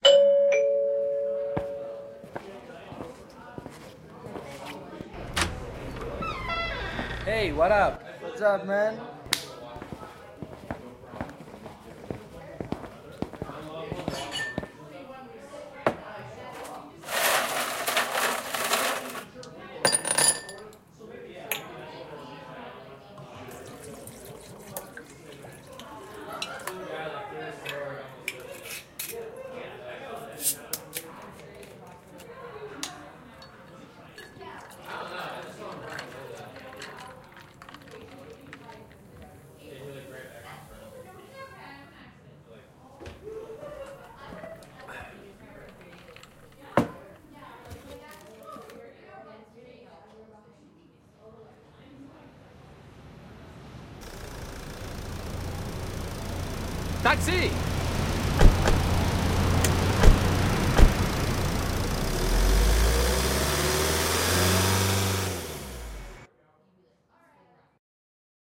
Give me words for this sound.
Friends Meeting-Going To Club
This audio file goes through a friends meeting with some alcoholic drinks. AFter that they take a taxi with destionation, the club.
friends, goodmood